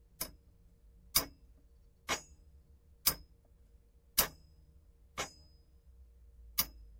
Sonido de un tubo contra un anillo.